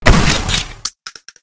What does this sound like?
shotgun fire
game, games, sounds, video